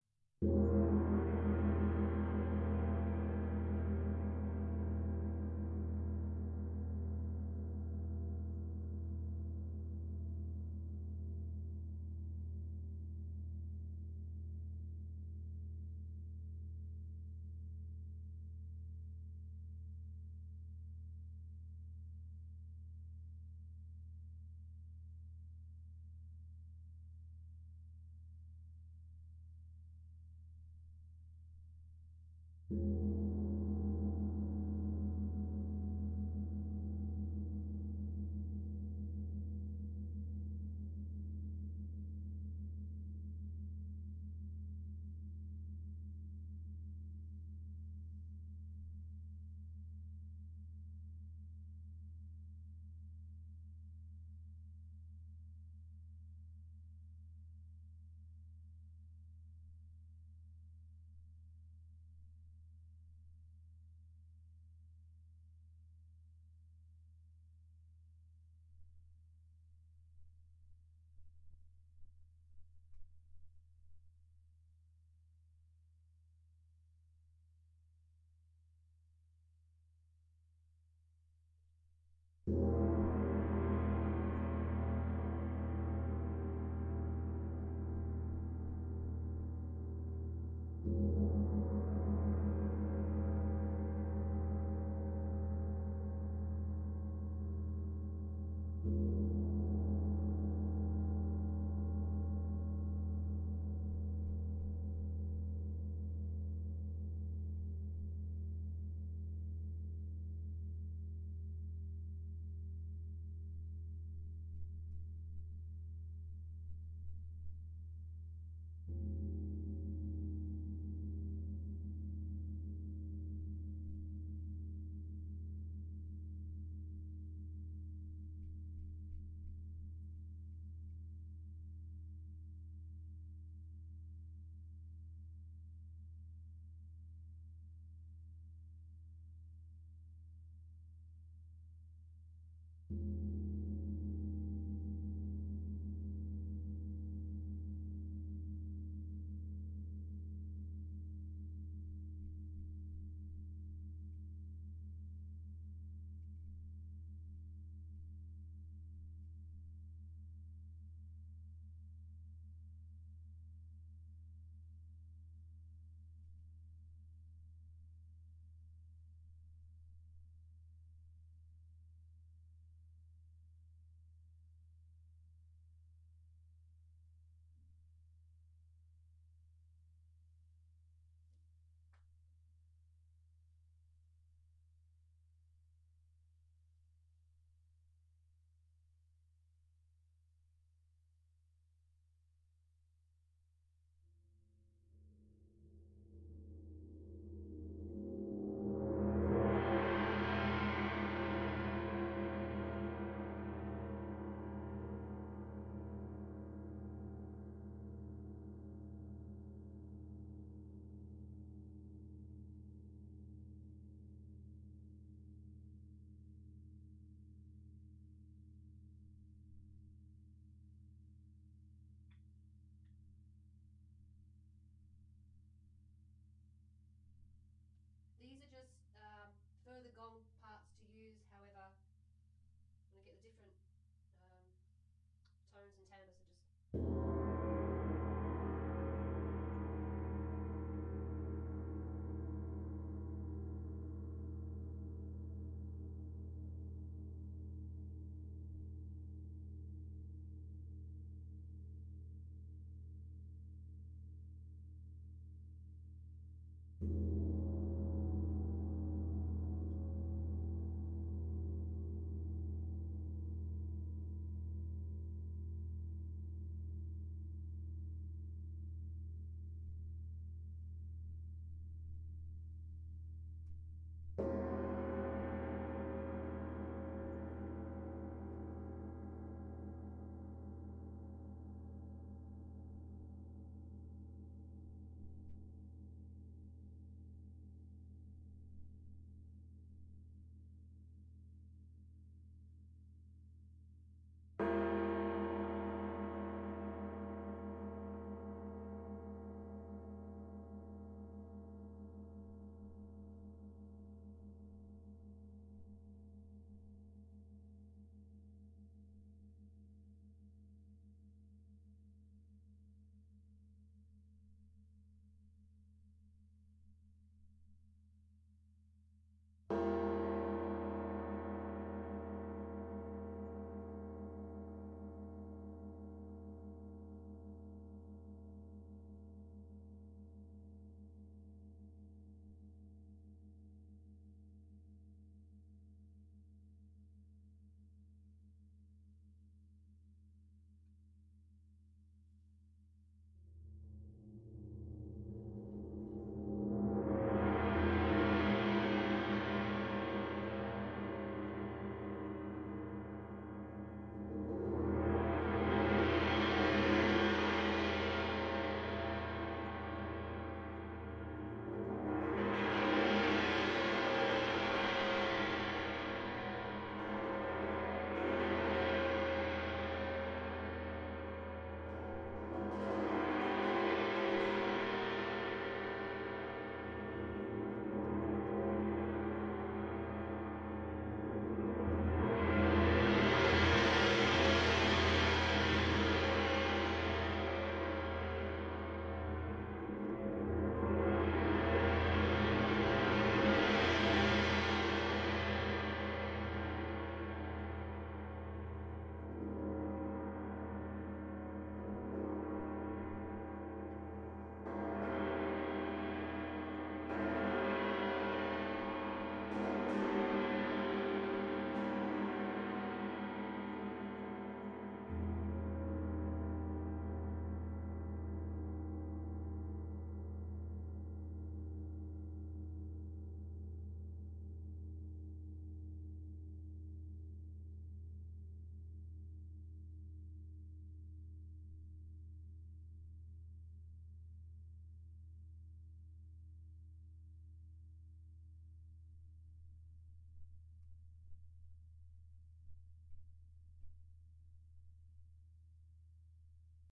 20 inch Wind Gong strikes and crescendo's , gong, ancient Chinese instruments
gong, percussion, wind